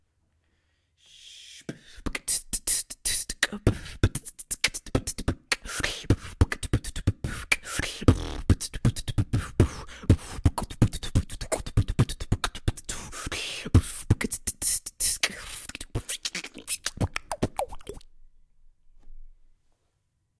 A simple beat that I made Beat-boxing
Beat-box, simple